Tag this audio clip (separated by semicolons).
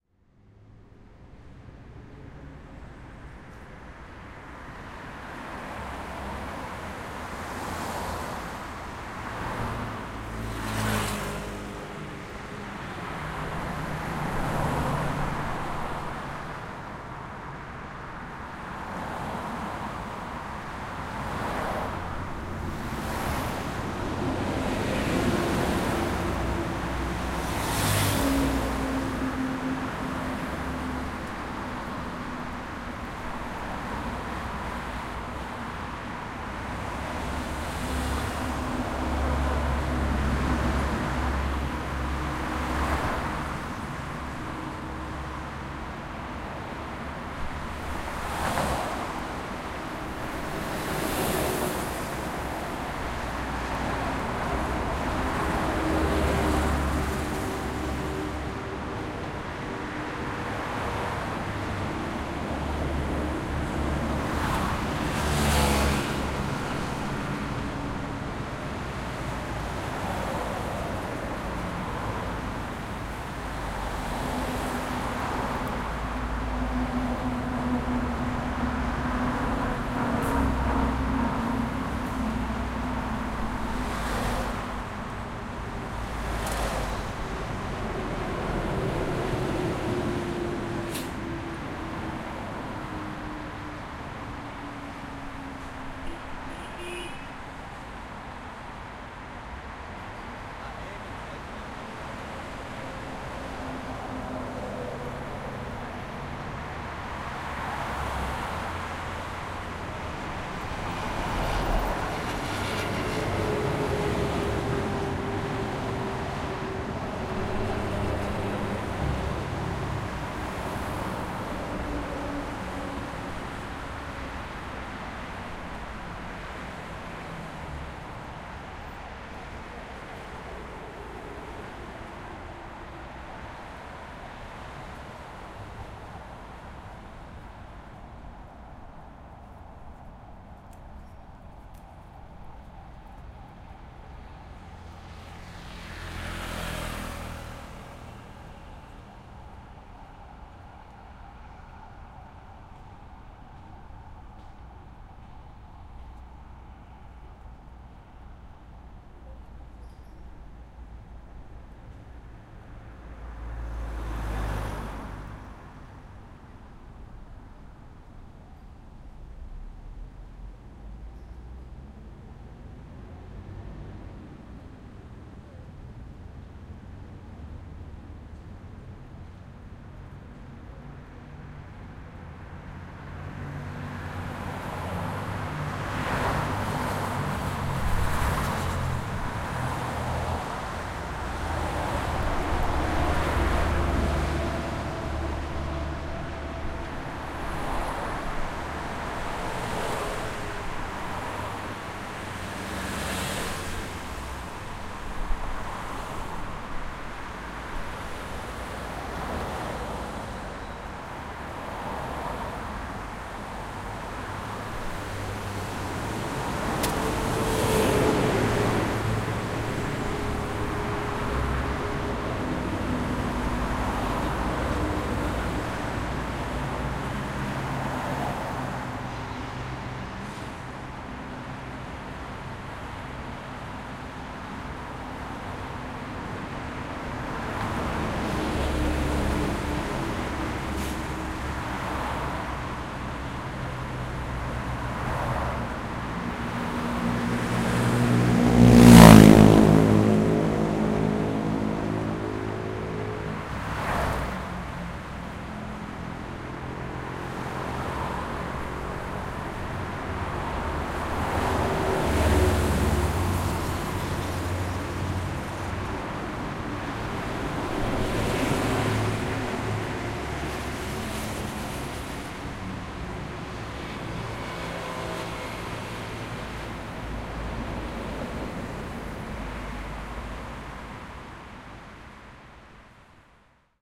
field-recording; horn; korea